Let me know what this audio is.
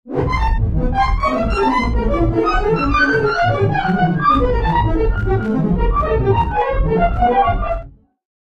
synth sound I programmed with the ES2 synth in Logic 8 - 2 Oscillators with different amounts of random pitch modulation send through a 100% wet synthesized Impulse response in Space Designer
aleatoric, convolution, effect, electronic, fx, random, science-fiction, strange, synth, unreal